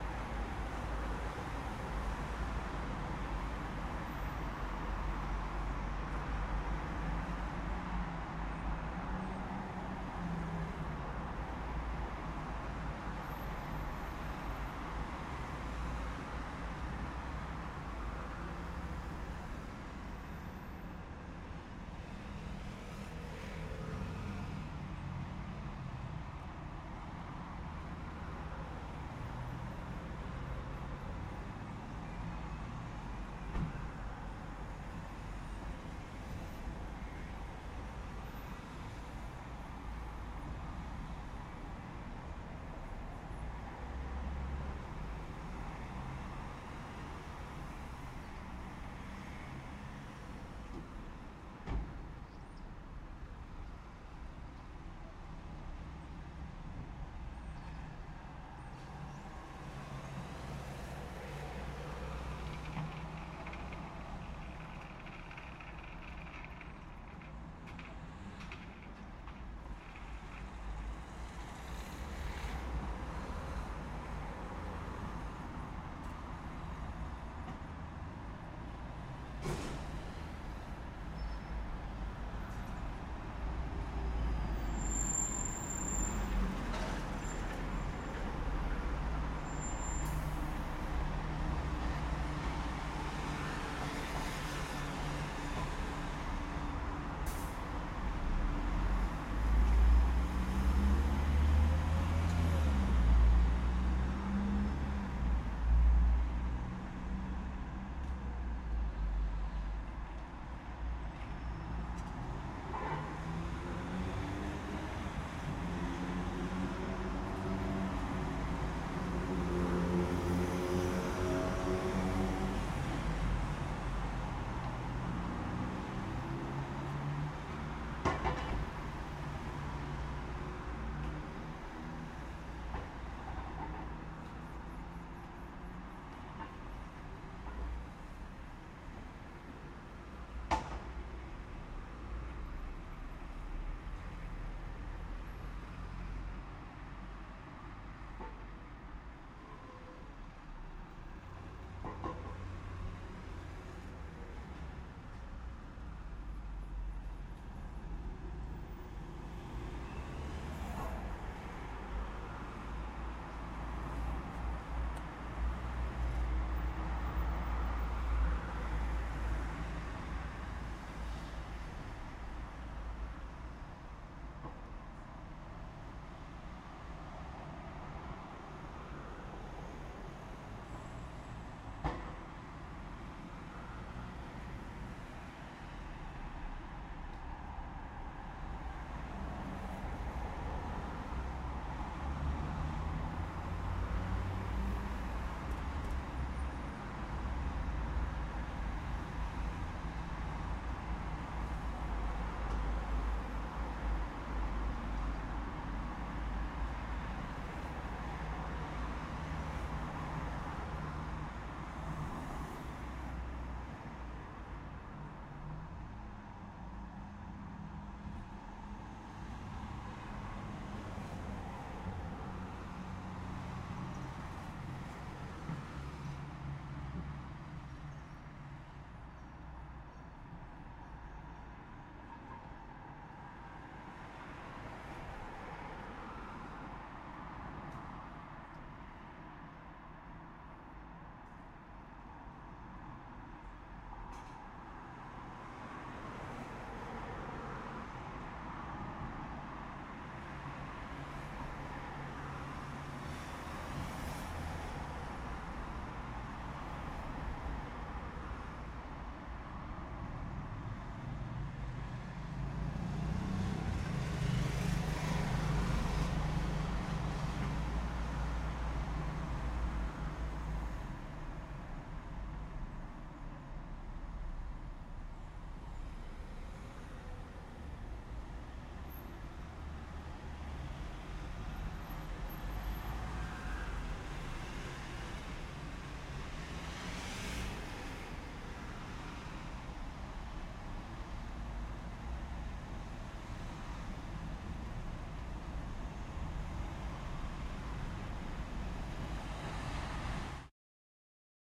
Toulouse Street Ambiance
Just a recording of the sound from my window in Toulouse,FR
Recorded with a Rode NT-2